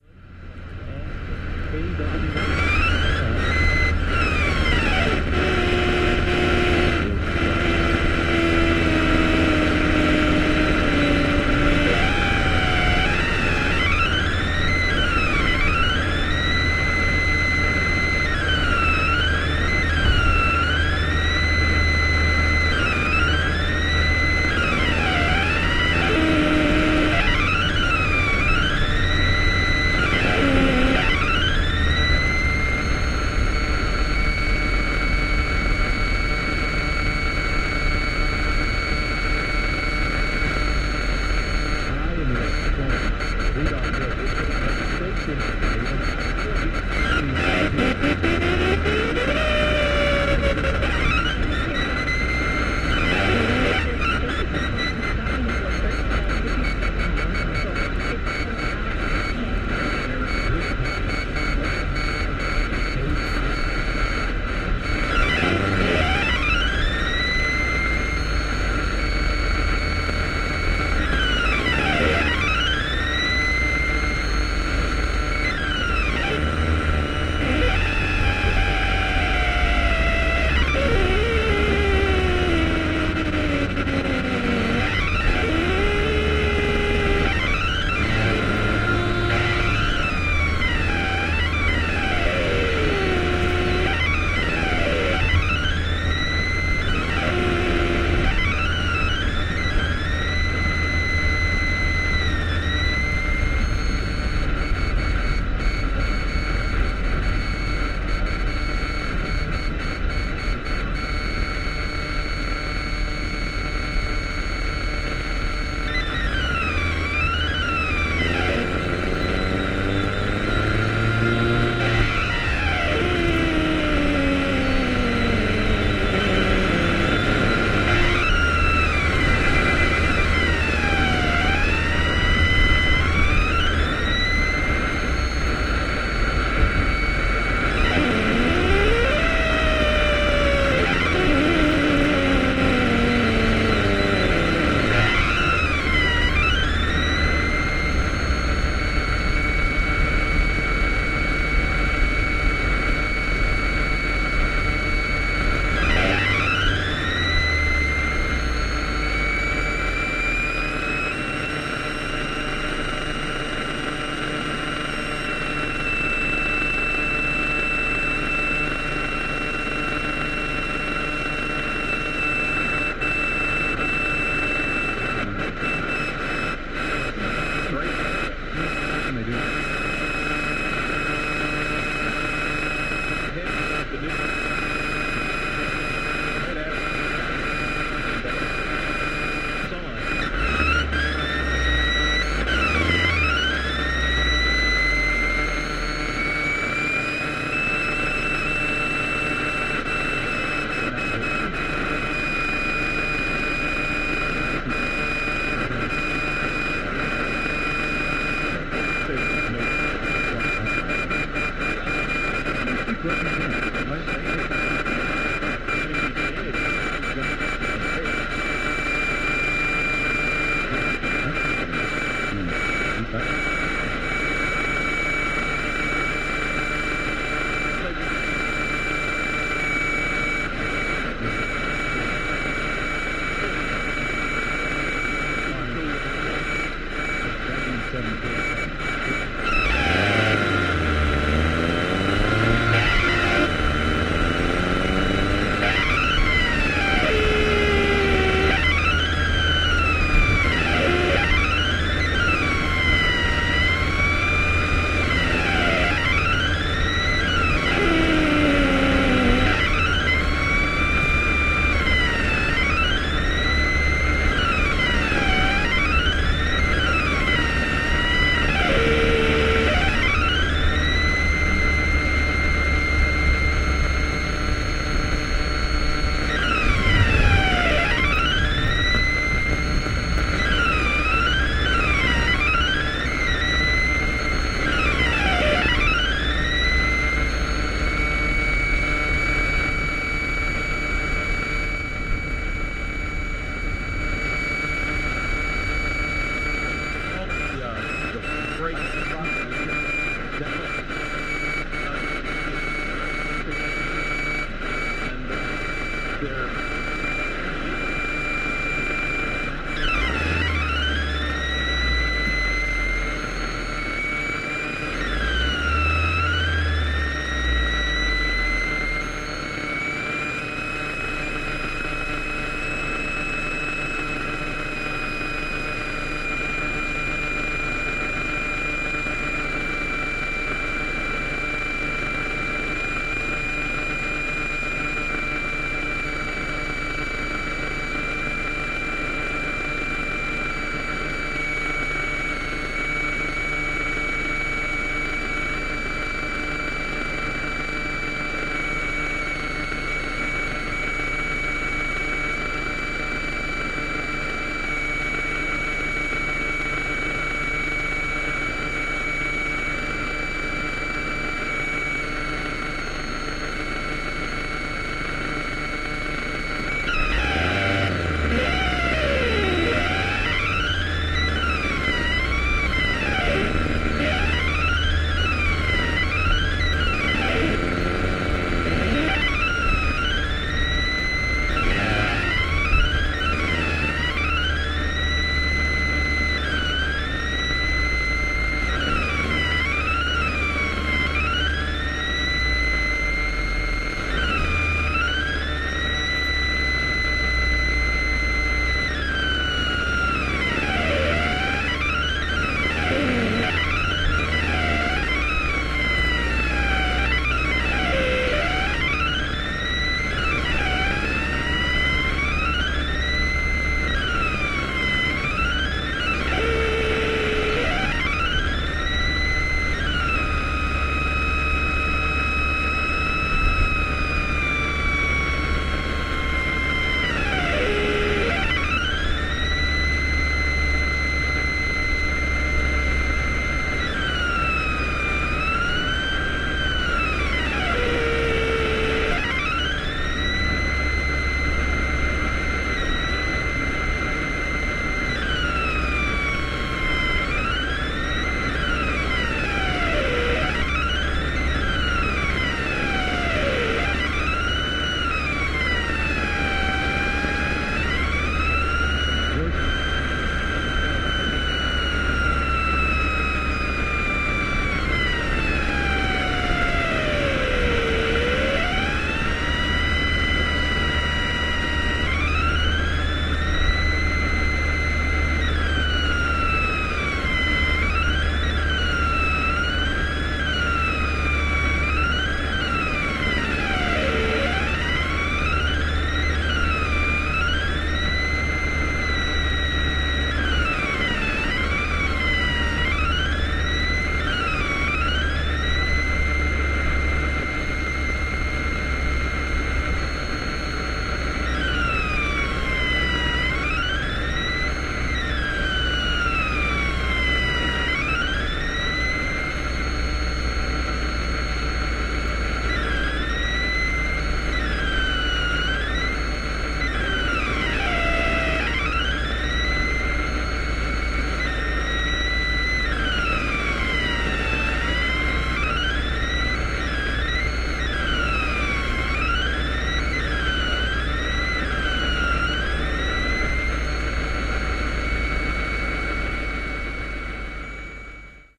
This was a strange discovery.
I discovered that my car, when AM is set to 540, picks up noise from acceleration.
The EMI is inverse to the pressure i put on the accelerator. Therefore, when i accelerate, the tone moves down.
This is a recording i made driving home from work. I used my ZOOM H4 with the built in stereo mic built in.
am, car, emi, motor, noise, radio, speaker, static